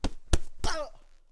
Triple combo of punches with reaction sounds recorded in studio
Hit, Attack, punch, sucker